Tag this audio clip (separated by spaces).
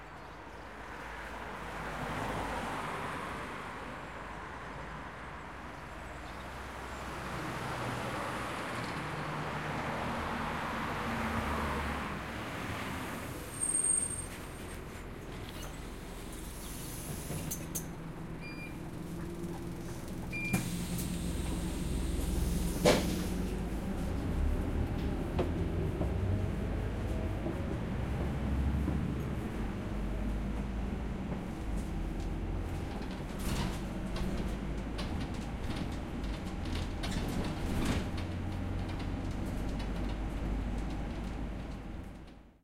Bus Doors Residential Street